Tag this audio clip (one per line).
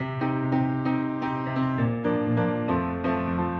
loop
bigbeat
big
funky
grand
grandpiano
klavier
beat
132
piano
funk
steinway
steinweg